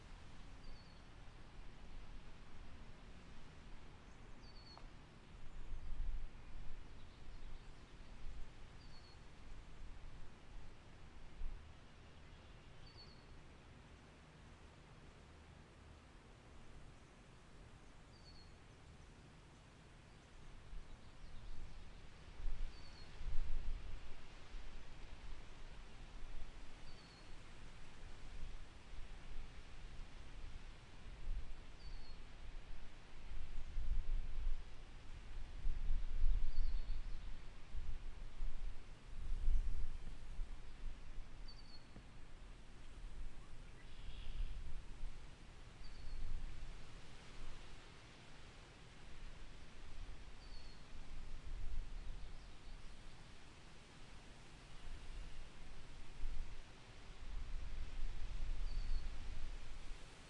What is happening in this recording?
OUTDOOR WHITE NOISE
Walked out the front door of my work and captured this for a video I'm working on.